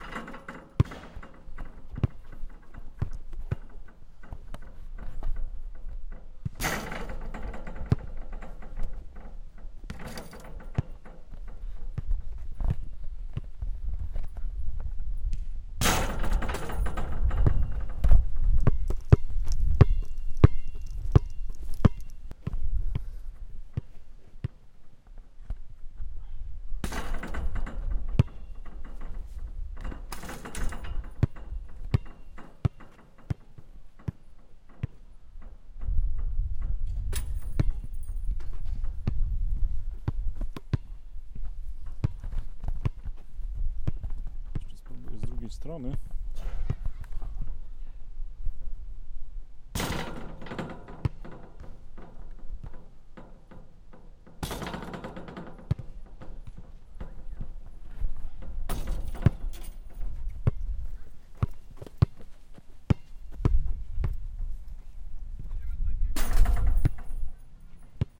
basket ball on the tartan and the sound board, open space